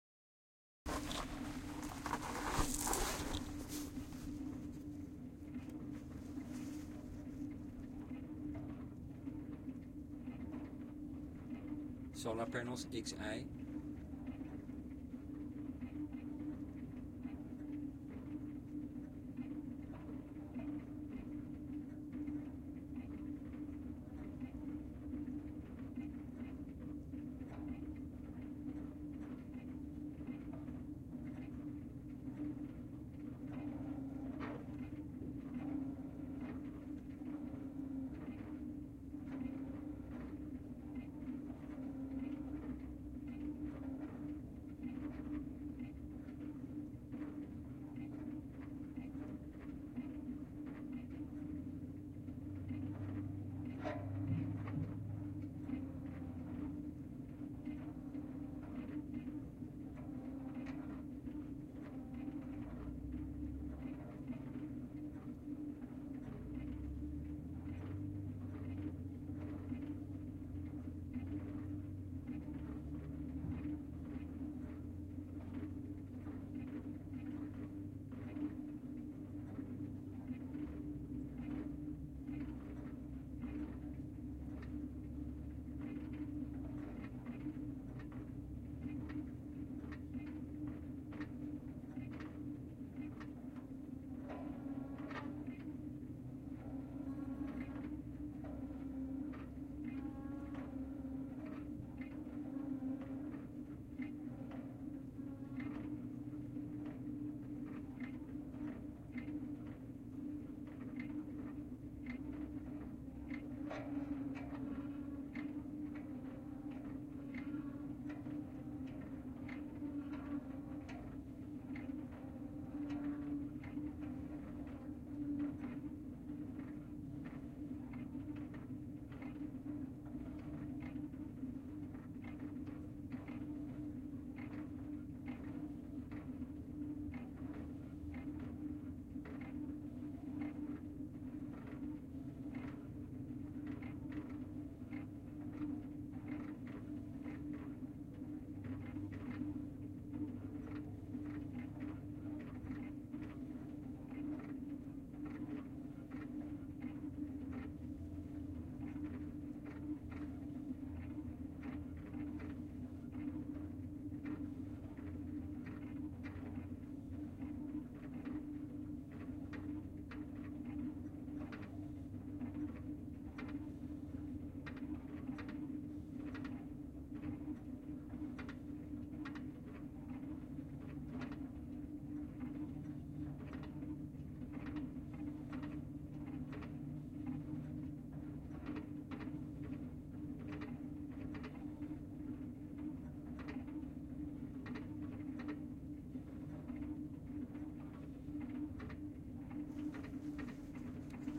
Recorded with a Cantar X , Neumann 191, a close XY recording of the moving mirrors at the Sierra Solar plant.
sun, atmo, mirrors, sierrasuntower, tower